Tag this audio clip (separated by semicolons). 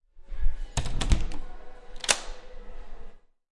CZ; CZECH; PANSKA